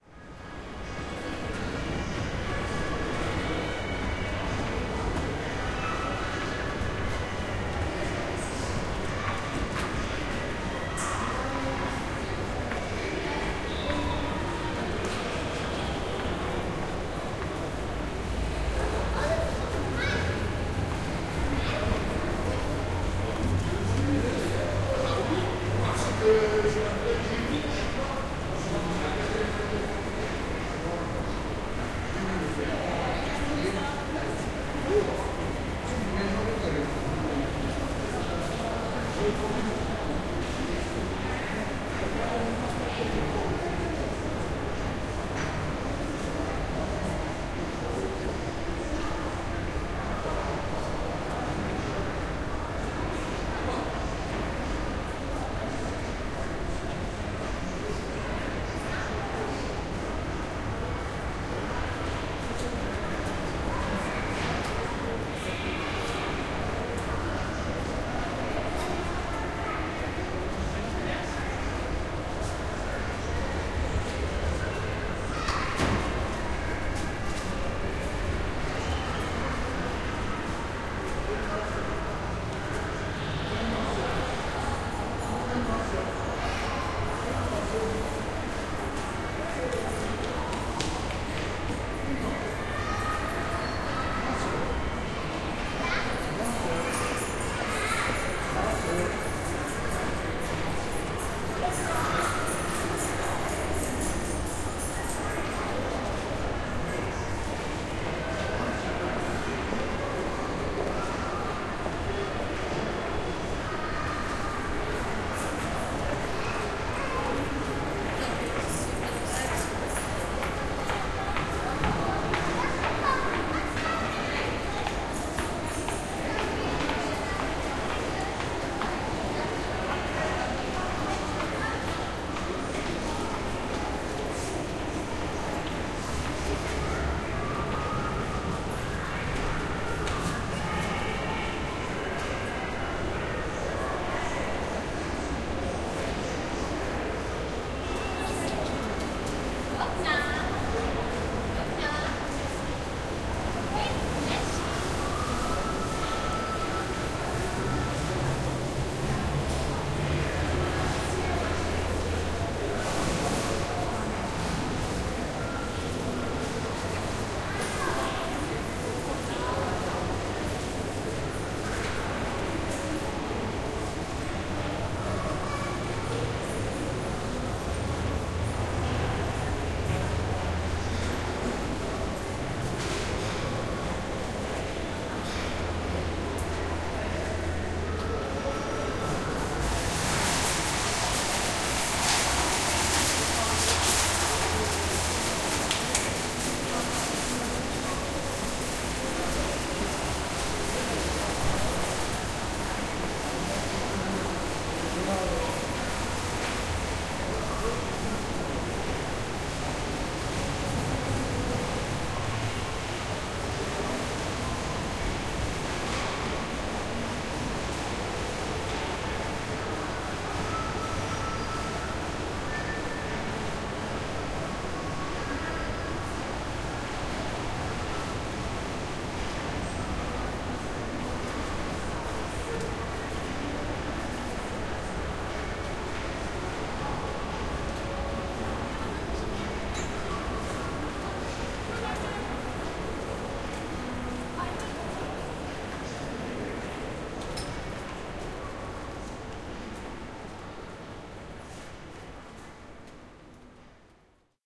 winkel centrum 2 AB
Shopping mall , recorded with DPA miniatures AB setting